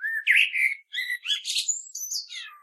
Turdus merula 18
Morning song of a common blackbird, one bird, one recording, with a H4, denoising with Audacity.
bird, blackbird, field-recording, nature